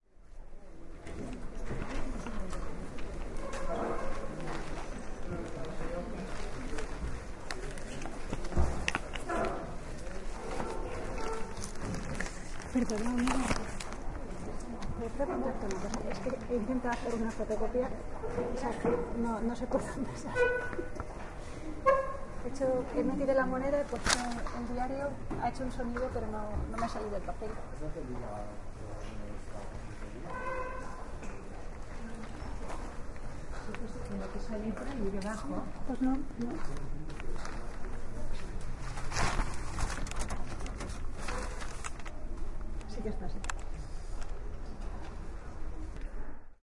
Sound recorded by Lucía Cocopino, as part of her proposal for the workshop Hertziosfera.
Recording made at the desk of a worker of the Jaume Fuster library, Barcelona.
hertziosfera, gts, gracia-territori-sonor